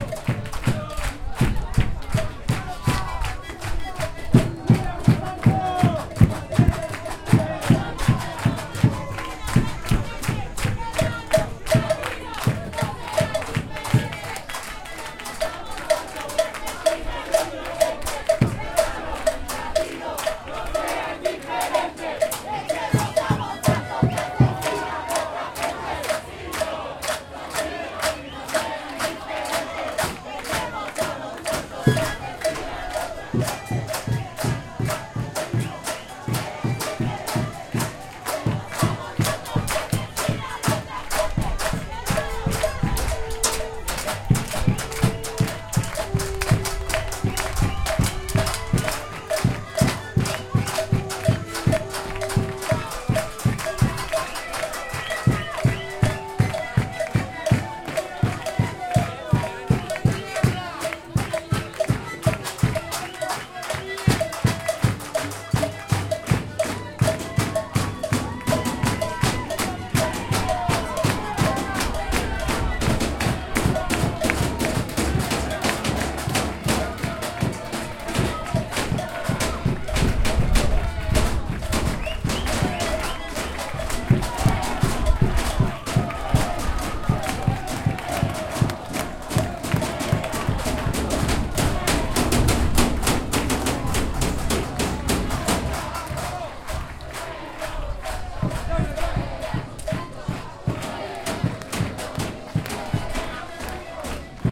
17 September global day angainst-monsanto. This was recorded in front of Monsanto´s office in Argentina.